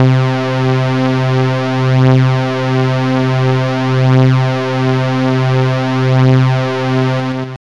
Detuned sawtooth waves